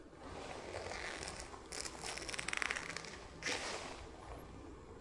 truck interior leather 008
Twisting fake leather to get straining sounds.
leather, twist, zoom-h2, stretch